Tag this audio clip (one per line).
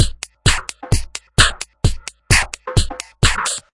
beat
drum
rhythm